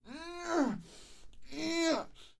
second sound of an effort
effort, try, work